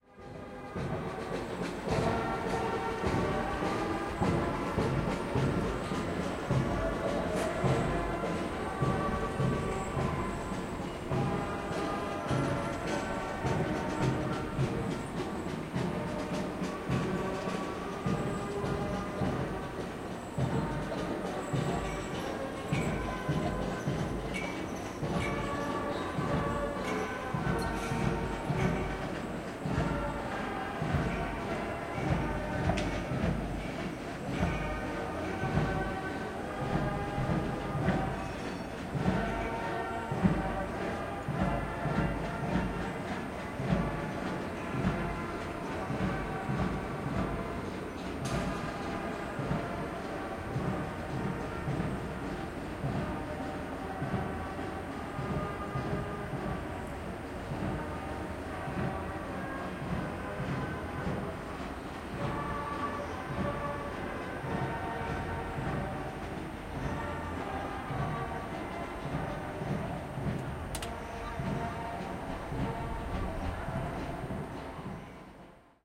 marching band carnival cologne 1
Marching band passing by in front of my apartment during carnival in the city of Cologne, Germany.